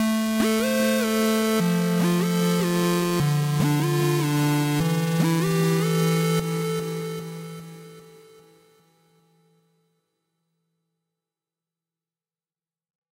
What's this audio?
Hardstyle loop - Background Sound - loop 1
Lead multi notes loop, 150 bpm !!
Programs used..:
Fl Studio 20.
Sylenth 1, for the lead sound.
Parametric eq 2, for eq.
FL reeverb2, for reeverb and a small delay/echo.
it this thing on ?
background, Hardstyle, loop